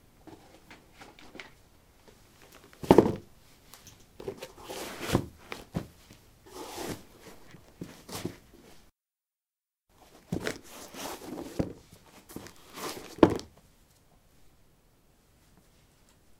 concrete 15d darkshoes onoff
Putting dark shoes on/off on concrete. Recorded with a ZOOM H2 in a basement of a house, normalized with Audacity.
step, steps, footsteps, footstep